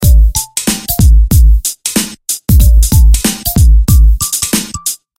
Old school drums
Simple old school drum loop with percs.
battery, beat, drums, loop, old, percs, school